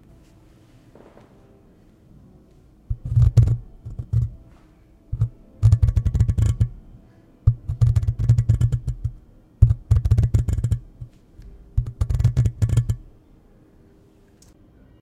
I scratch the microphone with my nails.